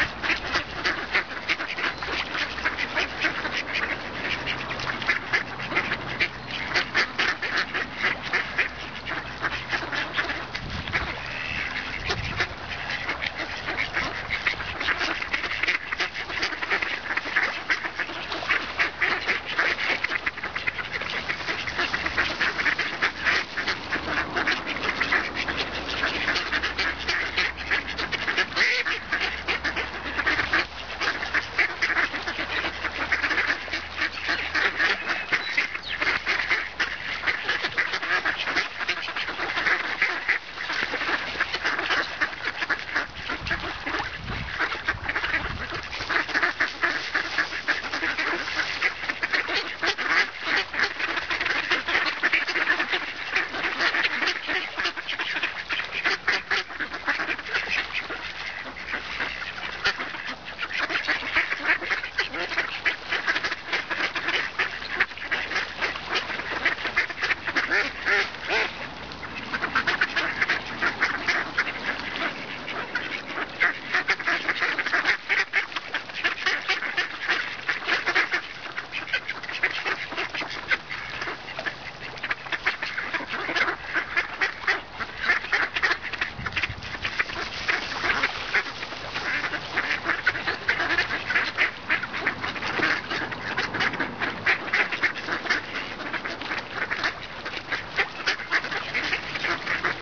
Ducks quacking while being fed at Woodhaugh Gardens, Dunedin, New Zealand.